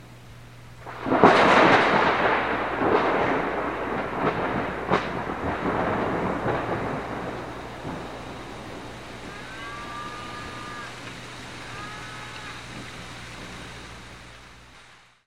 Some thunder I recorded from a window, even has some rain towards the end (and some cows too).
I Recorded it with an optimus tape deck and an old microphone (The tape I recorded it on was a maxell UR), I then used audacity and the same tape deck to convert it to digital.
If you use it please tell me what you did with it, I would love to know.